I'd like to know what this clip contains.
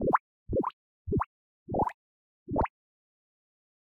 UI Buttons
A collection of 5 buttons I made for a game.
menu, watery, game, buttons, bubbly, clicks, click, ui, funny